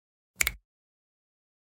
finger-snap-stereo-07
10.24.16: A natural-sounding stereo composition a snap with two hands. Part of my 'snaps' pack.
snaps, fingers, tap, crack, natural, click, bone, hand, percussion, pop, crunch, snapping